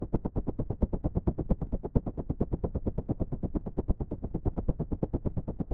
Helicopter Beat
This was originally a recording of a simple home clock, sped up, slowed down, edited and torn down, then exported as you hear it now.
Recorded on a Zoom H2.
beat, blades, chopper, clock, copter, engine, fast, field-recording, flight, flying, heli, rapid, rotor